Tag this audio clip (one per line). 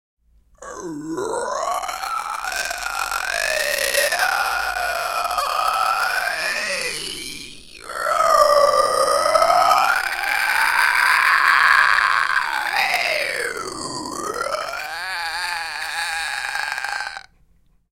Cry
Strained